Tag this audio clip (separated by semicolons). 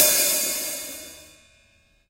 hihat; loose